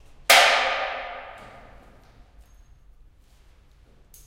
A Plastic-Metal Object hits the Large Concrete Basement Floor
An object thrown on a stone floor in an empty basement. Recorded in stereo with RODE NT4 + ZOOM H4.
smashing, echo, basement, hit, church, plastic, smash, stone, floor, room, hitting